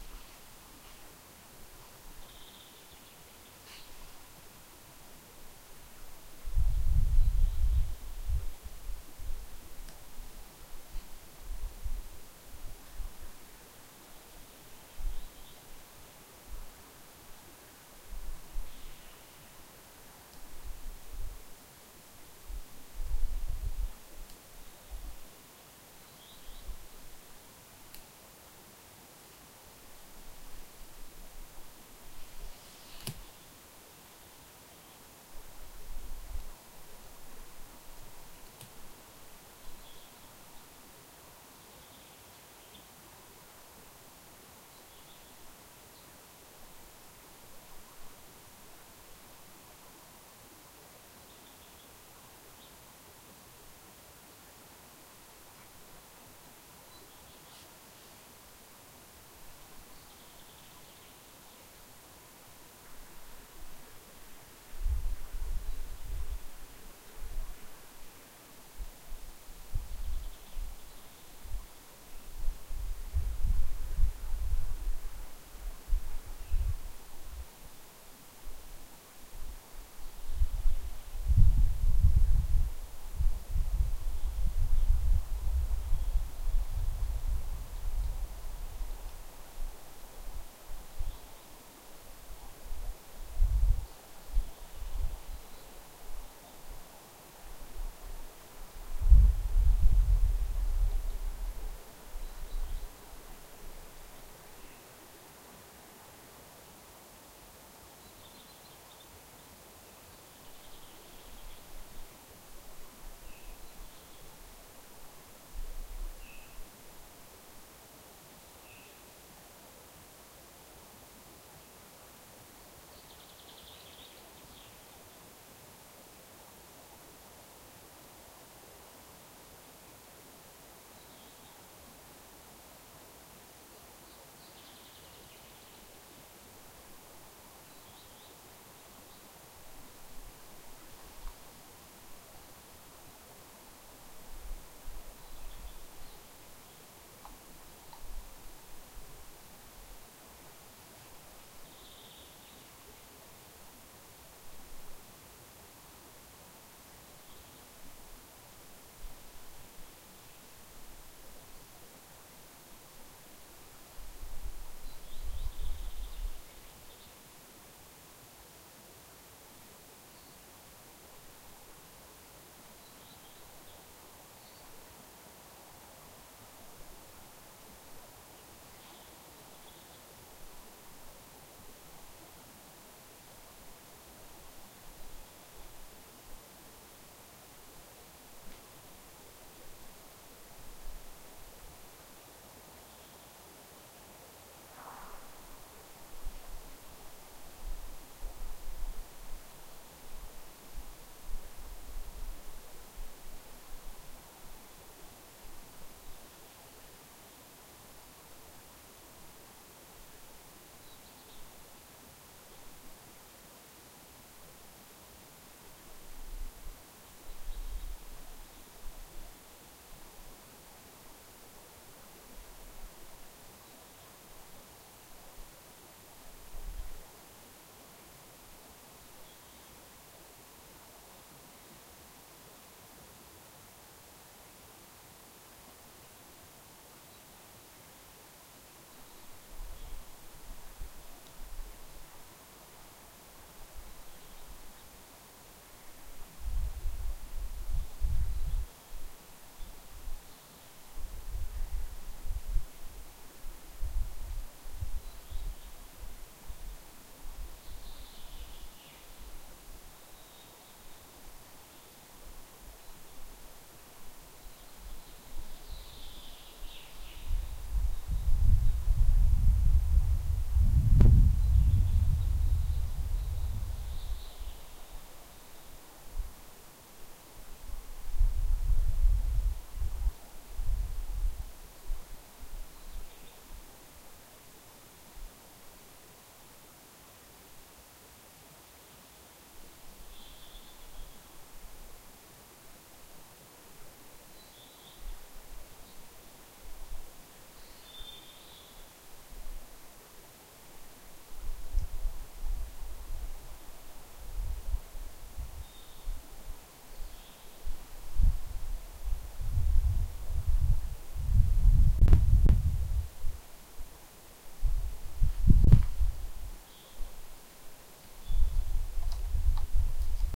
light forest sounds